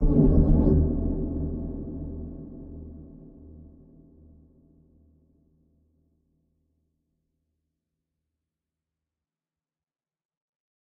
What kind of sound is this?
scary background 3
anxious, atmos, background-sound, bogey, creepy, drama, dramatic, ghost, Gothic, haunted, hell, horror, macabre, nightmare, phantom, scary, sinister, spooky, suspense, terrifying, terror, thrill, weird